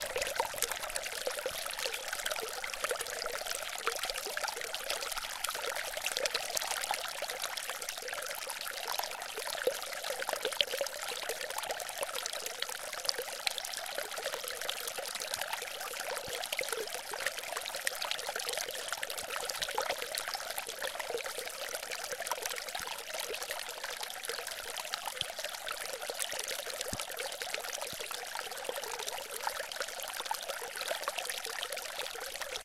water trickle 1
A small stream in the forest pouring over some rocks with an interesting sound. Loops quite seamlessly.Recorded with Zoom H4 on-board mics.
environmental-sounds-research, stream, field-recording, water, flow, trickle, river